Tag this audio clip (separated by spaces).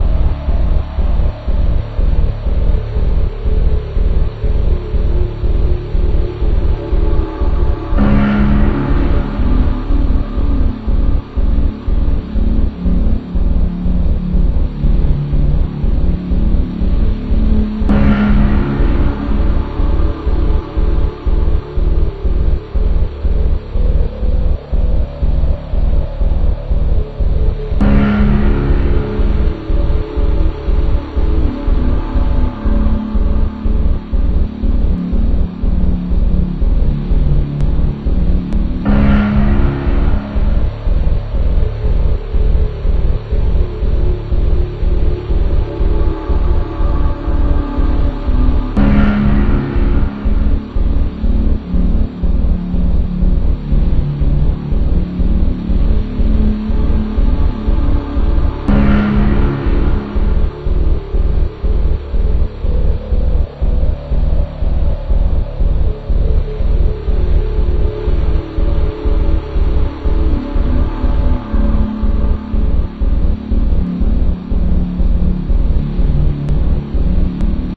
dark eerie loop creepy horror scary spooky sinister nightmare suspense